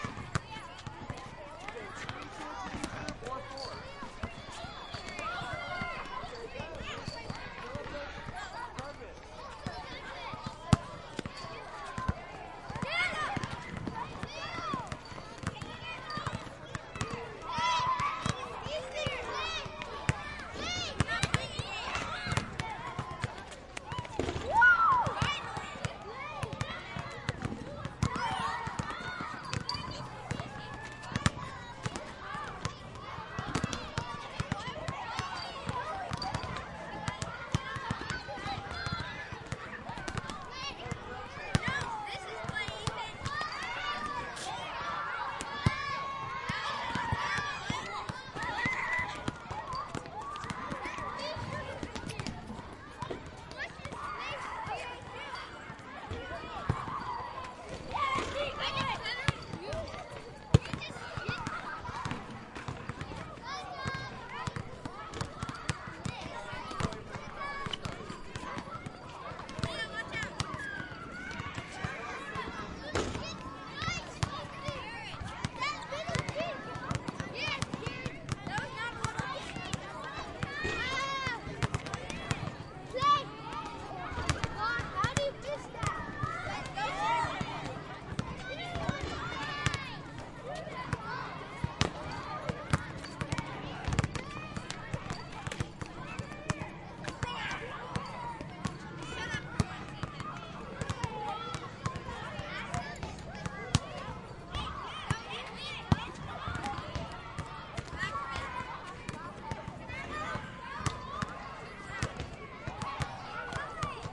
Grade school recess, outdoors. Mostly 4th and 5th graders, some playing basketball. Occasional vocals from the PE teacher. Light vehicular and air traffic. Mostly kids playing. Useful as ambience.
Recorded 44.1, 16 bit, on an H6 with the crossed stereo mics set at 90 degrees.